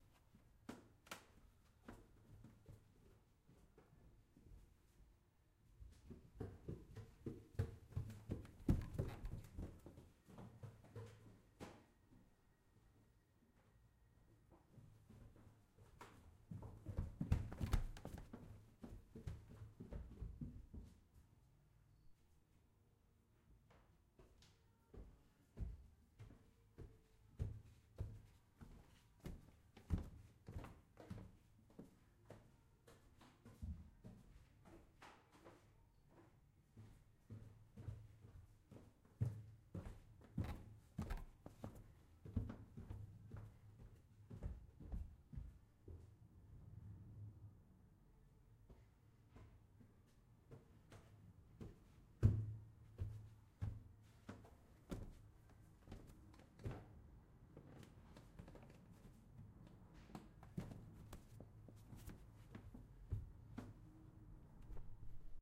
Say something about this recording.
Running,and walking up and down a wooden staircase, recorded with a zoom H6